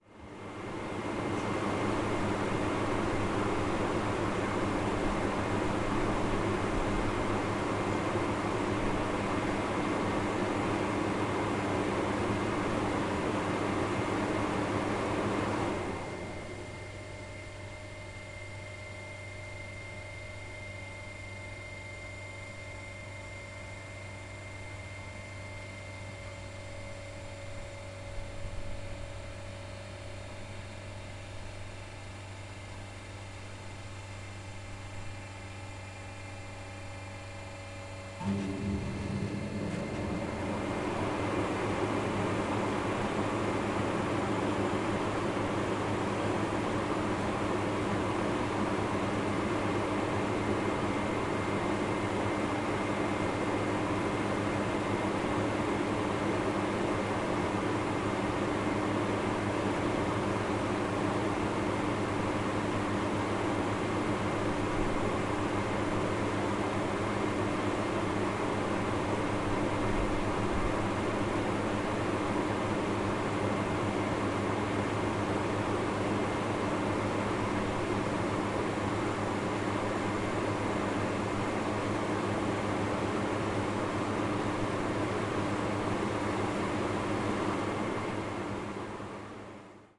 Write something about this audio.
hi-fi szczepin 01092013 warehouse fan on robotnicza street 002
01.09.2013: fieldrecording made during Hi-fi Szczepin. performative sound workshop which I conducted for Contemporary Museum in Wroclaw (Poland). Noise of warehouse fan on Robotnicza street in Szczepin district in Wroclaw. Recording made by one of workshop participant.
zoom h4n
fan, field-recording, noise, Poland, Szczepin, Wroclaw